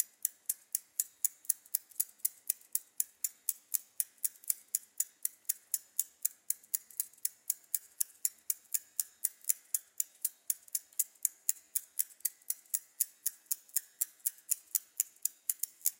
120BPM Bicycle Chain Loop dry
This loop was recorded at home with Zoom H4n and my bicycle's chain, you all know this sound probably )
Then it was quantized and processed with eq plugin as hi-pass filter.
There is also 'gated' version of this loop in this pack.
bicycle, loop, percussion